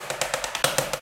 Fork on Trash Can
Multiple hits on a trash can by a fork in rapid succession.
Fork, MTC500-M002-s14, Trash